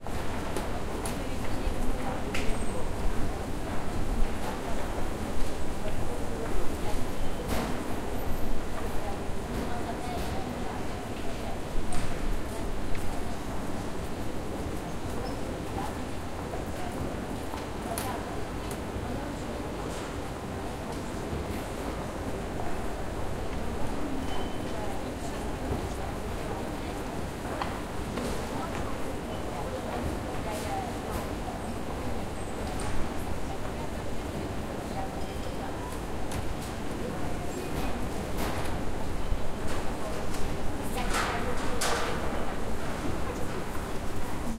Atmosphere in the trade center hall. Rustle of the staff cleaning the floor.
Recorded: 2012-11-08.
AB-stereo
atmosphere, cash, cash-desk, city, noise, shop, store, supermarket, town, trade